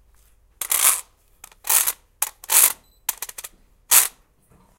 mySounds EBG Guillerme
Sounds from objects that are beloved to the participant pupils at the Escola Basica of Gualtar, Portugal. The source of the sounds has to be guessed.
Escola-Basica-Gualtar, mySounds, Portugal